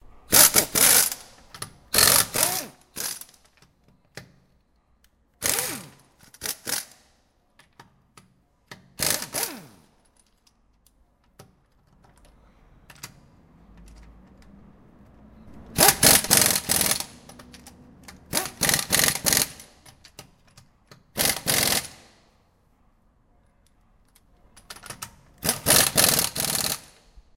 13092014 gronów retreading 002
Fieldrecording made during field pilot reseach (Moving modernization
project conducted in the Department of Ethnology and Cultural
Anthropology at Adam Mickiewicz University in Poznan by Agata Stanisz and Waldemar Kuligowski). Sound recorded in the retreading company in Gronów. Recordist: Adrianna Siebers. Editor: Agata Stanisz
roadside, gron, w, road, lubusz, retreading, fieldrecording, noise, poland, car, machine